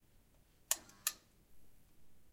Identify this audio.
pressing a light toggle switch
this is a toggle switch for a light. pressing it sounds like a buzzer.
switch, electrical, buzzer, light, changeover